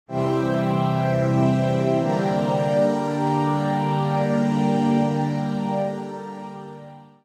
Dark Chords
Two dark-sounding, organ-like synth chords.
castlevania, cathedral, chords, church, dark, death, defeat, evil, funeral, game, loss, mourning, sad, videogame